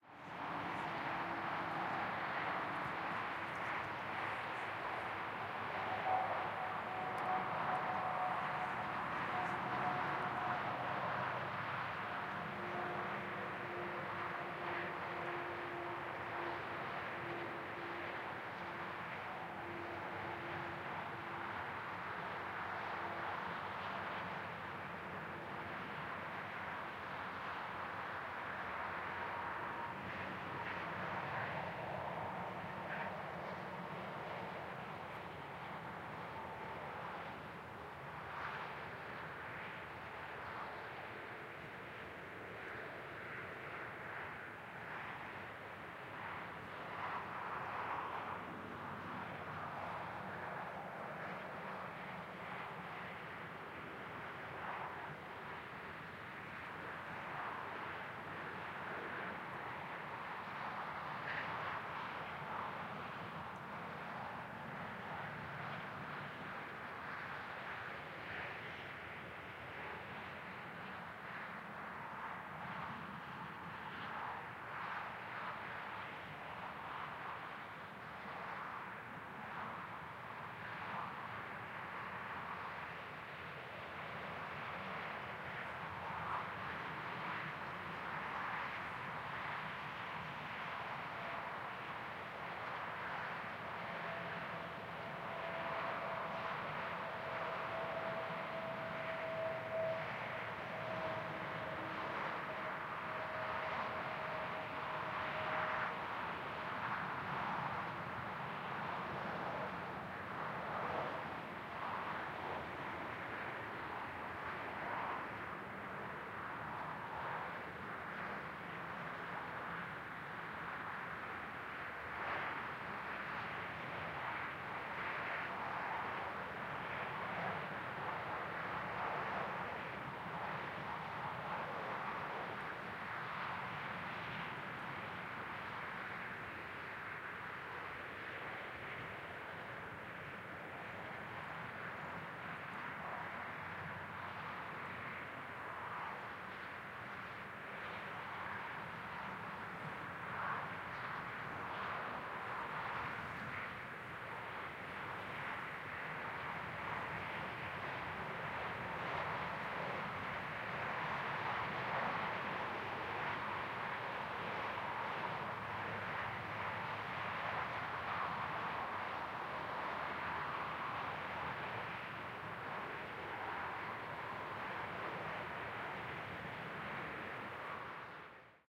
HIGHWAY TRAFFIC passby of cars, trucks and motorbike - 100 meters
Highway traffic, multiple passby of cars, trucks and motorbike, recorded at different distances, stereo AB setup.
Recorded on february 2018, CAEN, FRANCE
Setup : AKG C451 AB setup - Sounddevices 442 - Fostex Fr2le